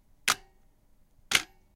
Nikon D800 Shutter 1 Sec with Lens
The Sound of the Nikon D800 Shutter.
With Lens.
Shutterspeed: 1 Sec
Camera, D800, Kamera, Lens, Mirror, Nikon, Shutter, Sound, Speed